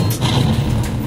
BB 6 big metallic hit
Hard metallic hit bowling
beach, big, bowling, field-recording, great-yarmouth, hit, holiday, metallic, nnsac, pin, seasideresort, ten, yarmouth